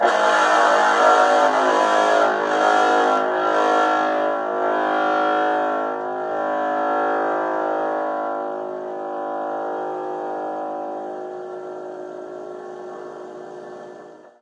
g-sharp-powerchord
Electric guitar being routed from the output of a bass amp into a Danelectro "Honeytone" miniamp with maximum volume and distortion on both.
fuzz, guitar